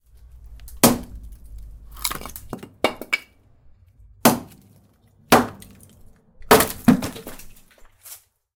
Chopping wood
Chopping some wood with axe
Wood, Pansk, Chop, Czech, Village, CZ, Panska